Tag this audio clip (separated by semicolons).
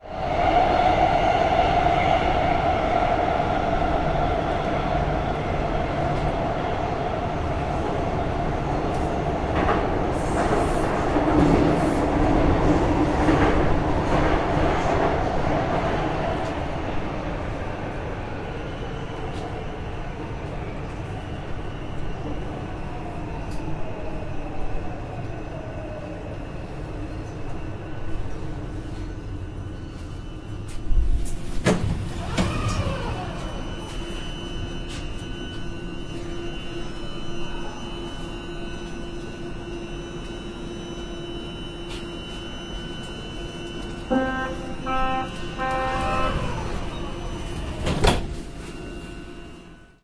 metro; noise; urban